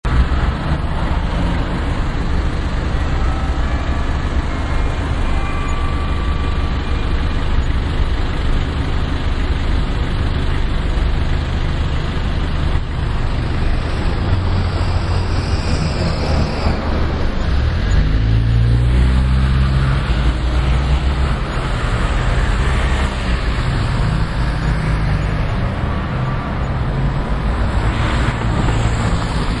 Holborn - Holborn Circus Ambience and church bell
ambiance, ambience, ambient, atmosphere, background-sound, city, field-recording, general-noise, london, soundscape